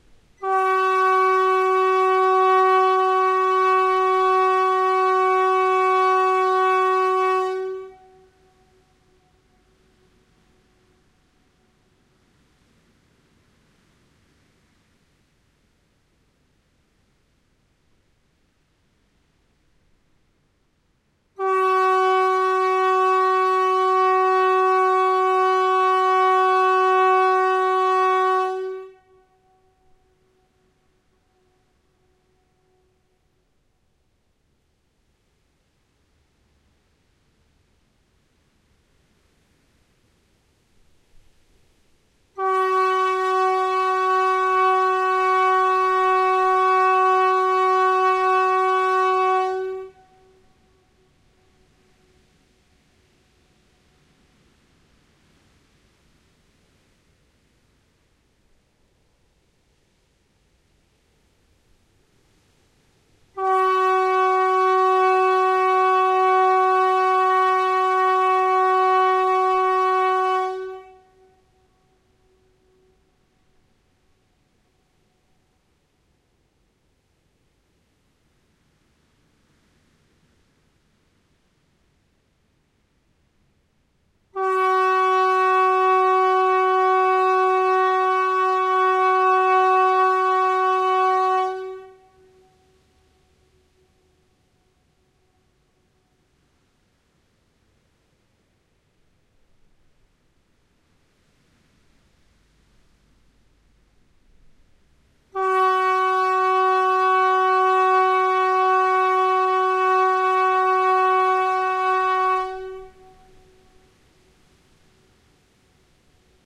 A test of the swedish emergency population warning system.
The system is meant to warn the puplic of emergencies such as wildfires and gas leaks.